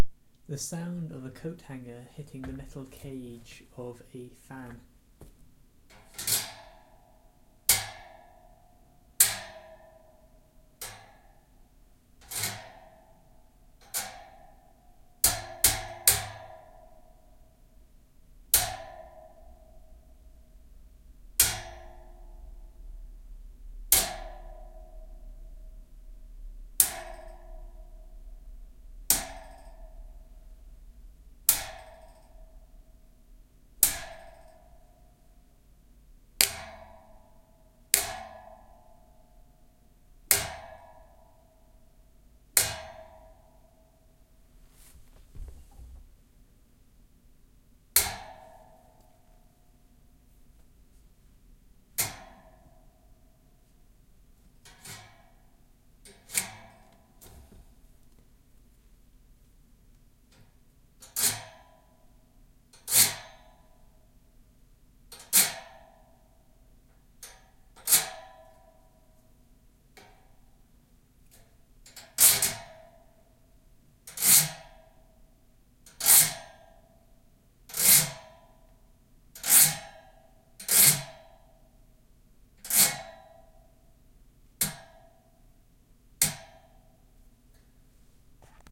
Coathanger on metal cage1
A metal coat hanger striking the cage of a fan but with lower recording sensitivity
clang, dong, metallic, ringing